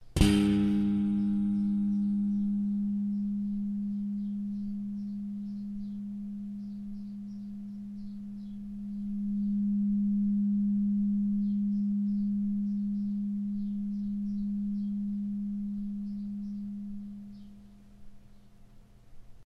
spring pluck
plucking a metal spring
spring, pluck